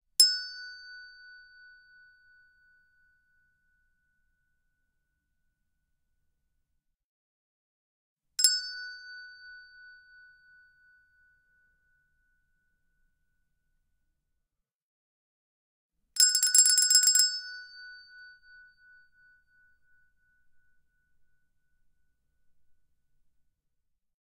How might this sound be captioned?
chromatic handbells 12 tones f#1
Chromatic handbells 12 tones. F# tone.
Normalized to -3dB.
English-handbells, tuned, handbell, bell, single